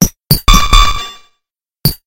Real 0bject count2
Weird industrial/experimental novelty loops.
electric, industrial, effects, noise